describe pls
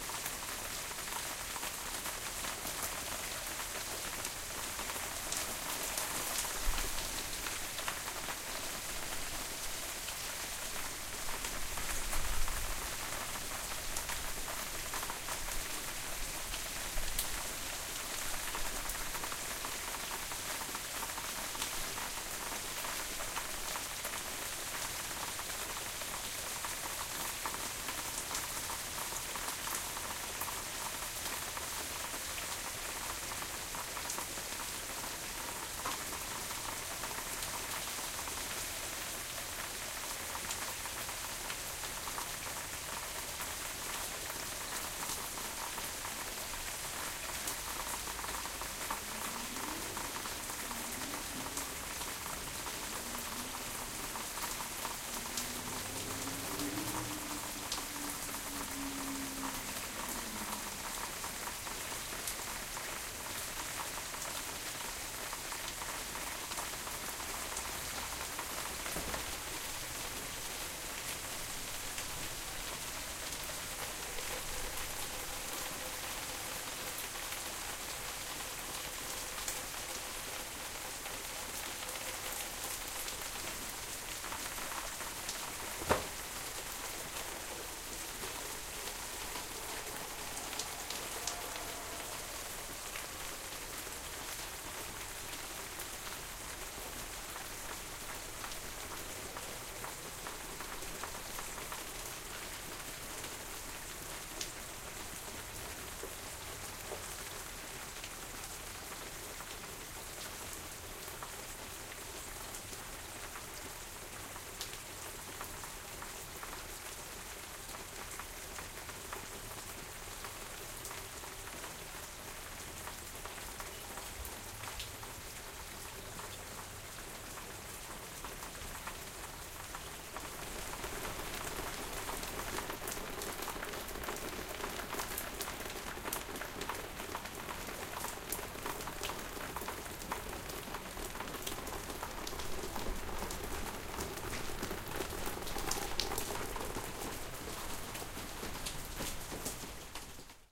Sound of Rain in the city.
Hi friends, YOU ARE INVITED to check out the video of this sound